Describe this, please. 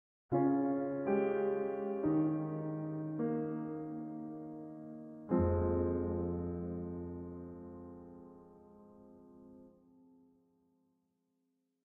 A quiet slow phrase expressing loss.
lost, loss, sorrow, defeated, melancholy